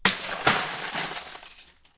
Glass shattering/breaking sound in a bucket. Could be used as a drum sound for an industrial beat.